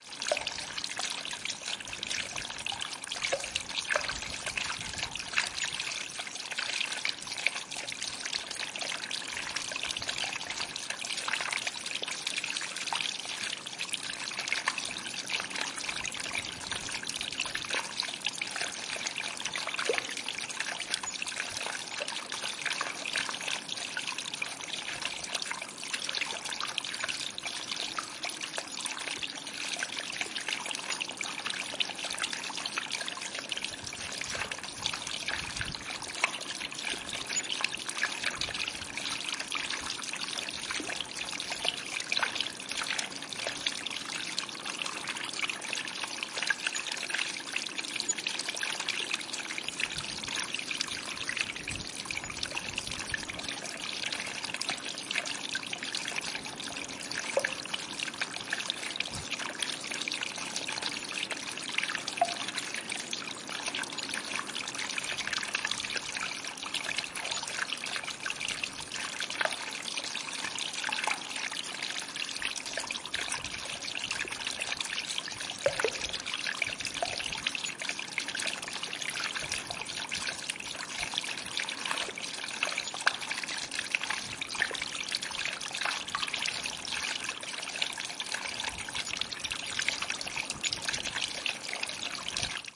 Leaking drainage system of an old limekiln
I've been to some old massive limekilns and it's like a cave inside with lots of water dripping from the ceiling. There was also a draft coming through the whole building. And there's a leak in the wall. That's the water you hear streaming.